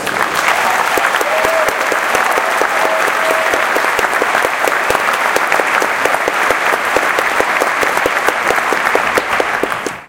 This recording was taken during a performance at the Colorado Symphony on January 28th (2017). Recorded with a black Sony IC voice recorder.